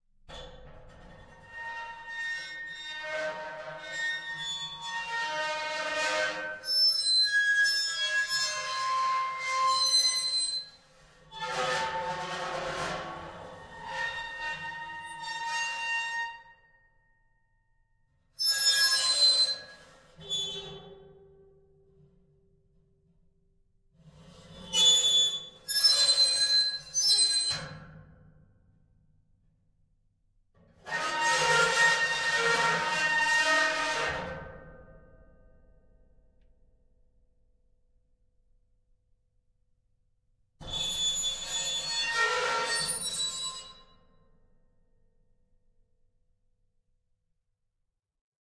scratching metal 02
An empty, resonant metal box, treated by various objects.
dungeon, industry, horror-fx